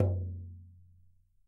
toy drum light hit
light hit on small toy drum, recorded on Tascam DR-5 didgtal recorder.